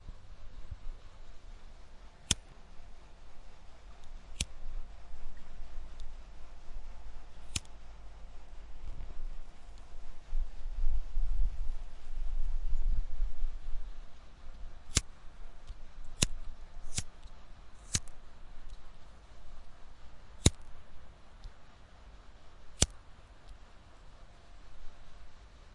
Lighter ignition and smoking
Ignition of a lighter and smoking
fire, smoking